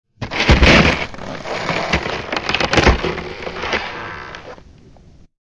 Ice 2 - Slow
Derived From a Wildtrack whilst recording some ambiences
BREAK, cold, crack, effect, field-recording, foot, footstep, freeze, frost, frozen, ice, snow, sound, step, walk, winter